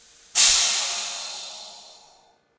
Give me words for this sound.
Slips air release v2
Air pressure release from a piece of mechanical equipment on an oil rig